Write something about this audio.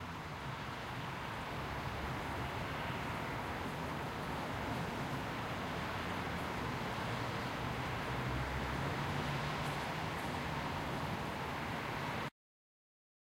Sound ambiant outside
outside, ambiant